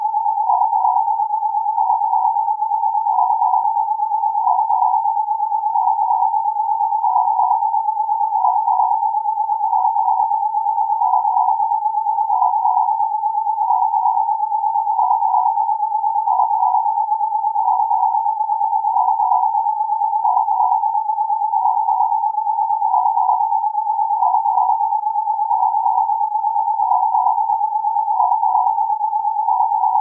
See the description on my previous Iteration Project packs to understand how the sound generation process works.
The starting point for this iteration project is the sound:
I have analyzed the sound and found that it is centered around 855.84Hz.
Used AudioPaint Parameters
Left channel - saturation
Right Channel - hue
Sine wave
Quadratic interpolation
30s duration
Min freq: 0
Max Freq: 2x856 = 1712
Linear Scale
computer, conversion, image, image-to-sound, iteractive, iteration, picture, processed, sound-to-image, synthetic